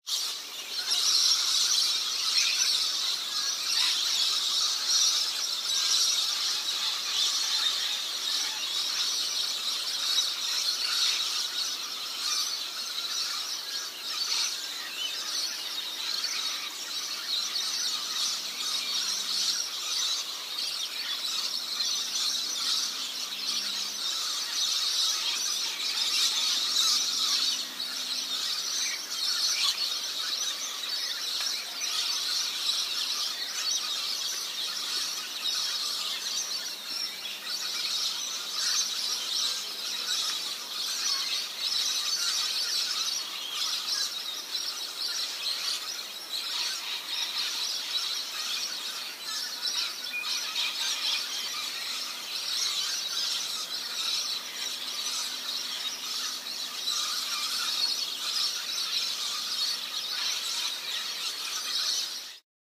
Birds - Australian outback
Recording on iPhone while camping in Australian outback. Pretty wild and loud but may be useful for one of your projects.
australia, birds, birdsong, country, field-recording, nature, spring